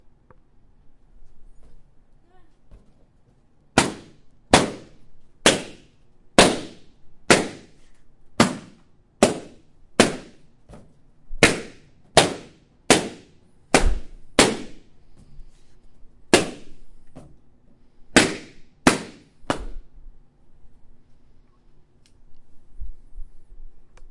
Sound of balloons being popped with a pair of scissors.
popping, crackle, poppingballons, pop, ballons